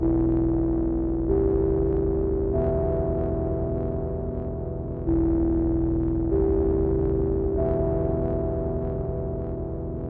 Some notes from a digital synthesizer patch that I created. Somewhat dark I guess.
loop, ambient, noisy, atmosphere, dark, digital